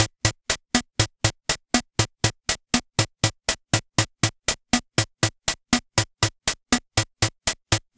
MusiTech MK-3001 rhythm pops
The electronic pops rhythm from a MusiTech MK-3001 keyboard. Recorded through a Roland M-120 line-mixer.